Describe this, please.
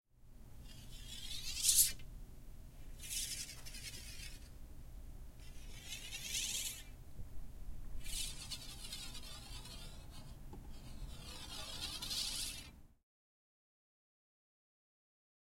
Laser sound made with a plastic curtain in a motel room
Zoom H4N Pro